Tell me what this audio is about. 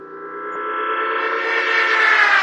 Fooling around with my electric/acoustic guitar. Taking the cable in and out getting feedback. Then layering a lot of effects over the top.
sample, heavy, guitar, effects